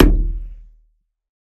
WATERKICK FOLEY - HARM LOW 05
Bass drum made of layering the sound of finger-punching the water in bathtub and the wall of the bathtub, enhanced with lower tone harmonic sub-bass.
bassdrum, foley, kick, percussion